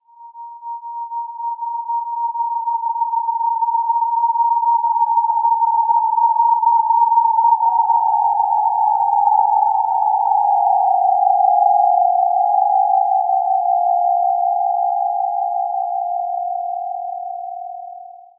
This gesture was created with the technique invented and developed in my PhD, called Histogram Mapping Synthesis (HMS). HMS is based on Cellular Automata (CA) which are mathematical/computational models that create moving images. In the context of HMS, these images are analysed by histogram measurements, giving as a result a sequence of histograms. In a nutshell, these histogram sequences are converted into spectrograms which in turn are rendered into sounds. Additional DSP methods were developed to control the CA and the synthesis so as to be able to design and produce sounds in a predictable and controllable manner.
Additive-Synthesis, Campus-Gutenberg, Cellular-Automata, Dynamical-Systems, Histogram-Mapping-Synthesis, algorithmic, ambience, ambient, atmospheric, cinematic, electro, electronic, film, sound-beats, synth, texture